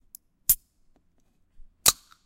Can Open
Opening a can drink. Soda.
can, drink, soda